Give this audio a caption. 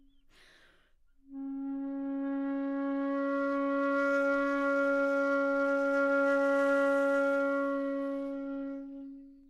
Part of the Good-sounds dataset of monophonic instrumental sounds.
instrument::flute
note::Csharp
octave::4
midi note::49
good-sounds-id::201
Intentionally played as an example of bad-dynamics